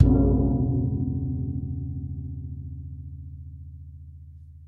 Hitting a metal barrel. Sounds a bit like a cong. Recorded with Shure SM 58.